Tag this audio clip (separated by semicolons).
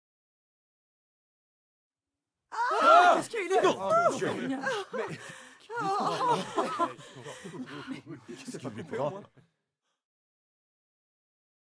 spot; vocal